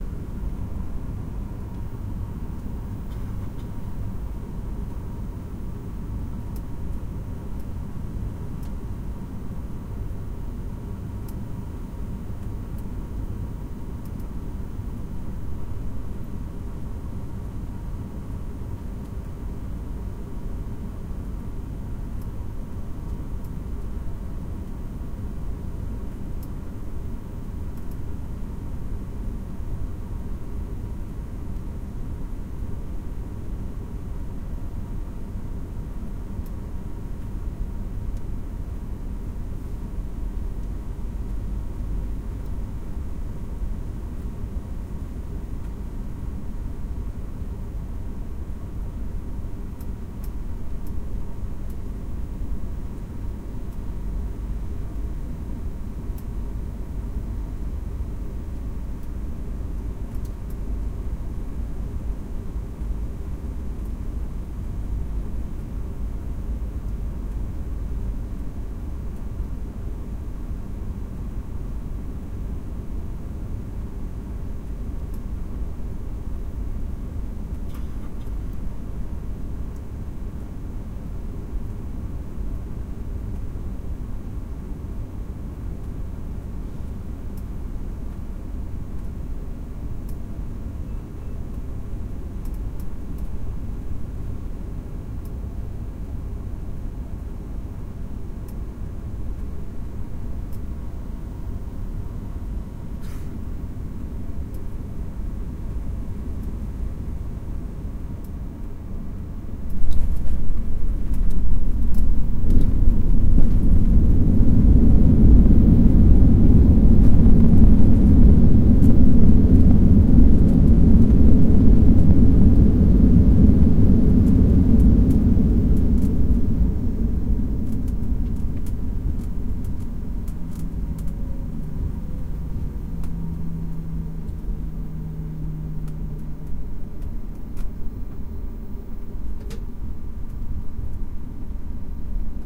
Recording of Plane landing. Apologies for clicking, something was loose on the plane.
Recorded with Tascam DR05
aeroplane, aircraft, airplane, aviation, flight, jet, plane, take-off, takeoff